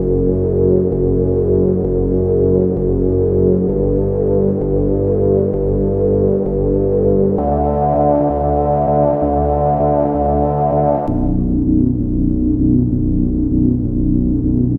secuencai de pad bajo